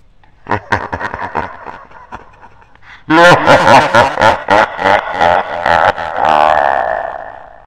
moan8 ECHO LOW PITCH
low pitched moan of a woman that gives horror and erie effect, done in audiocity
low, moan, erie, woman, pitched, horror